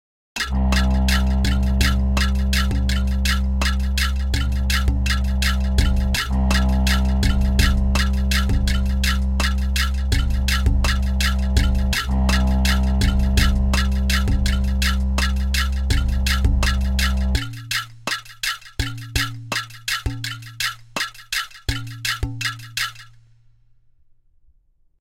A little loop I created when I was playing with the order and keys of the percussion
bamboo, Loop